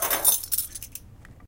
Keys on steel tin
Keys being drawn and scraped against the lid of a common brand of breath mints.